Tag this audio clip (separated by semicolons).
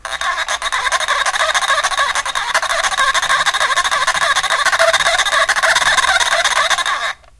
stereo; toy